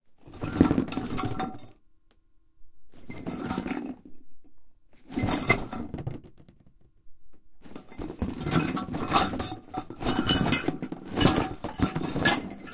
source sound used to excite other effects (resonators and the like)